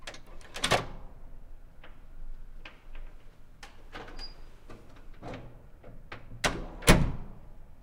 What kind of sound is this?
Metal push door open3
This is a industrial sized metal door opening then closing. This is the alternate.
metal, opening